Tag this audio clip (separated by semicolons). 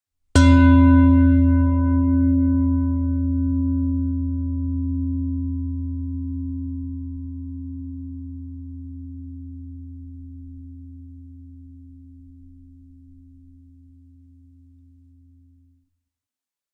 percussion; bell; ring; stainless-steel; ding; lid